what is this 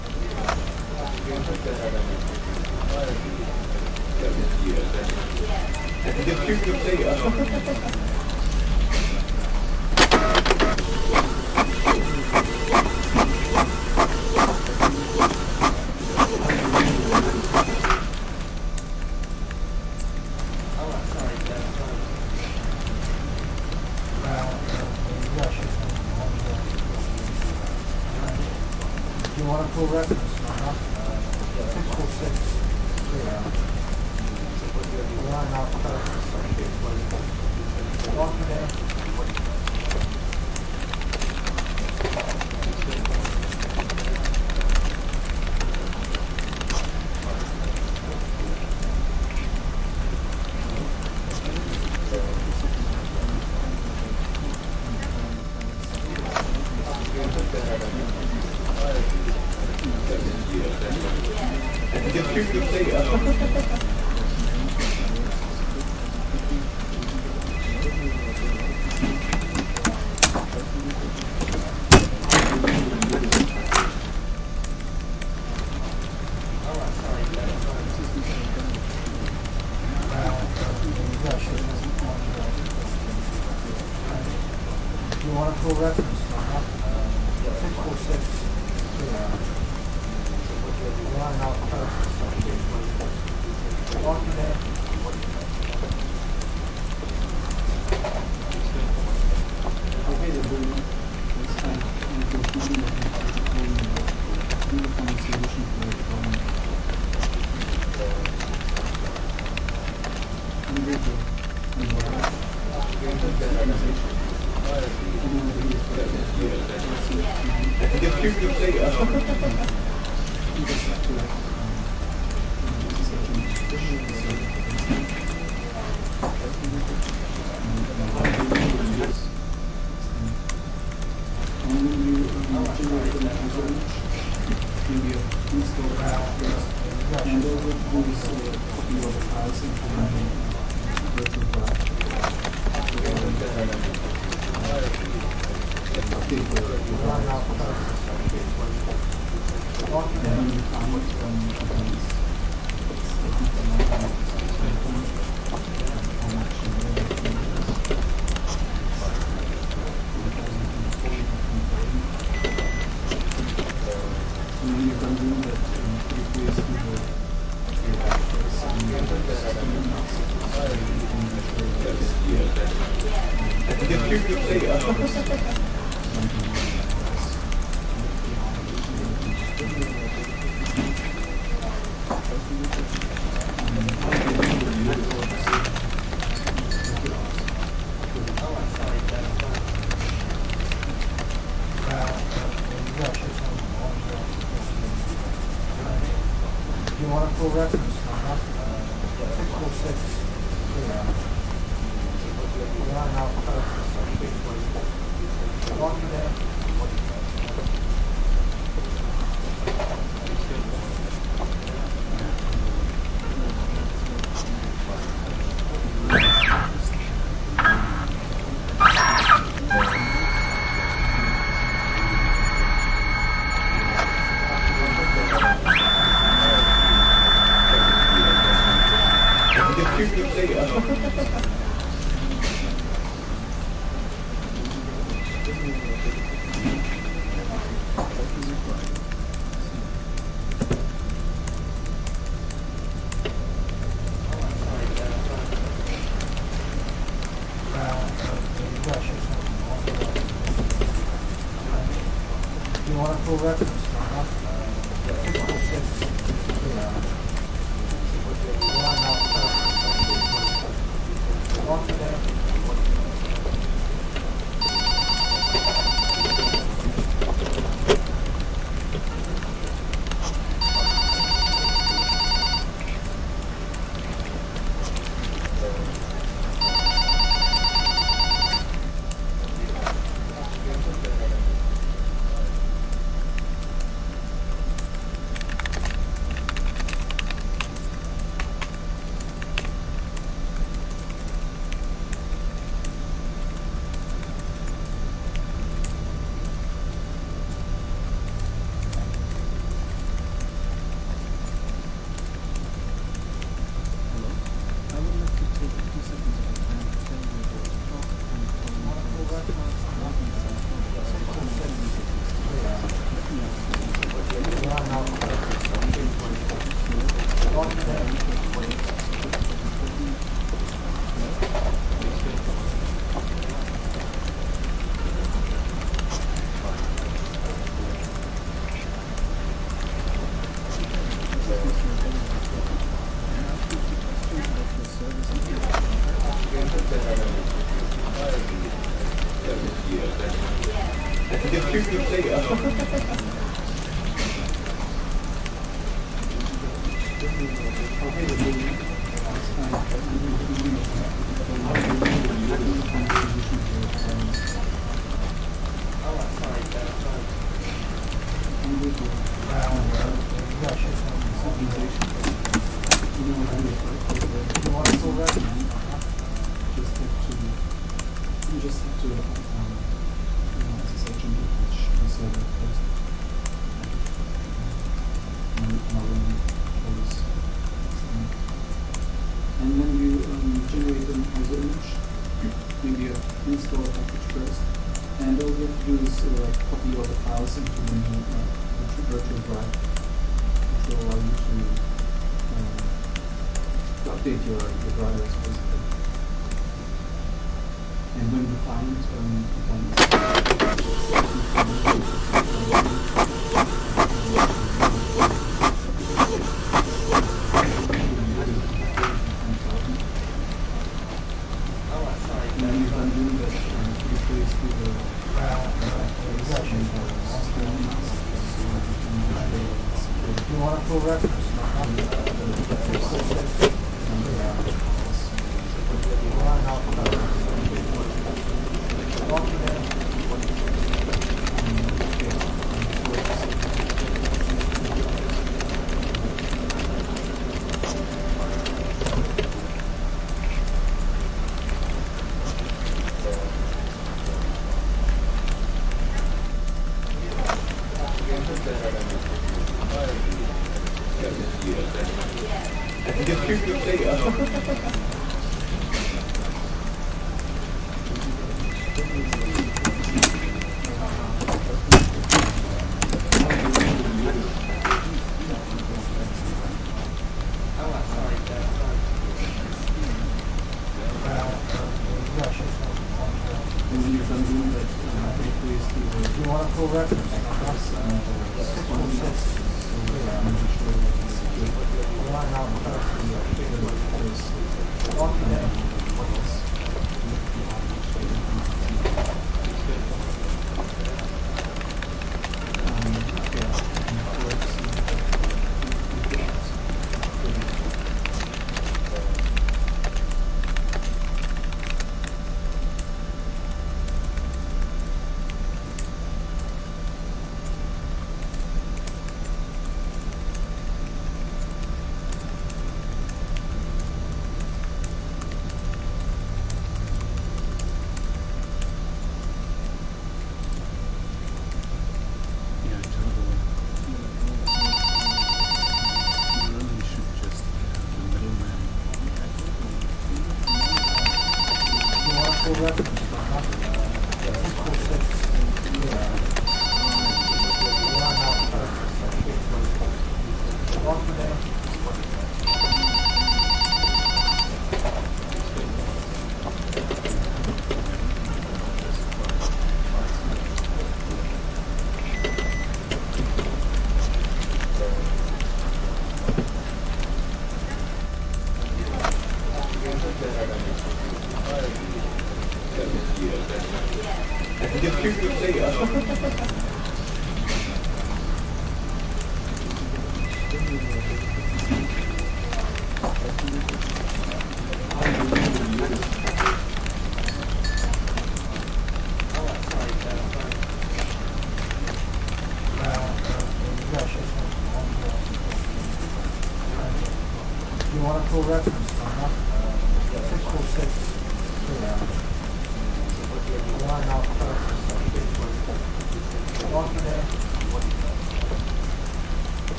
The Office
Busy office soundscape. A mix of various office activities and some voices, some of which were recorded for this purpose. Very subtle mixed-in. A new take on Office Ambience.
Edited with Audacity.
Plaintext:
HTML:
ambiance, ambience, ambient, background, bell, boss, business, computer, corporate, corporation, co-worker, co-working-space, fax, foley, job, jobs, keyboard, office, paper, pencil, people, printer, ring, talking, telephone, typing, worker, working, writing